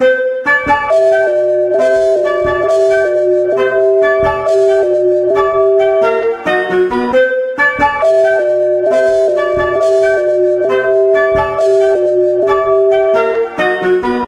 Arent'T SOMethings "Pointless"?recorded at 134.800bpm.
dance, smart, synth